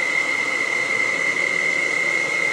sound effect for a saw used in our game